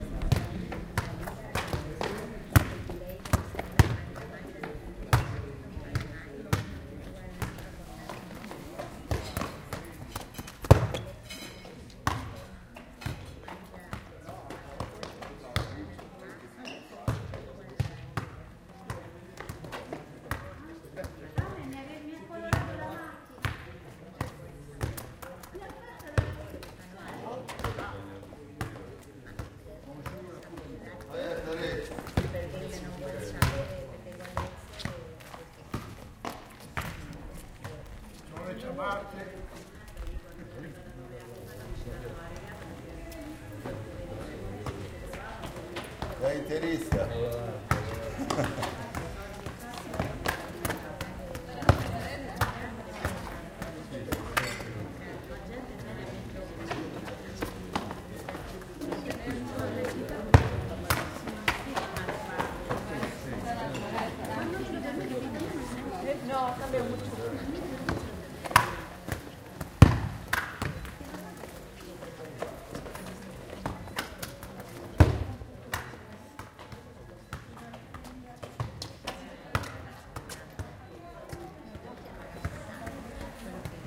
Calcata 220917 02 mod
It was a sunny day in Calcata, Italy, tiny amazing village caved in rocks where artists use to live and play football with their kids too. a boy and his dad hit the ball close to me, some not distant voices and restaurant noises.
football, playground, ball, summer, life, day, play, town, soccer, kids, Italy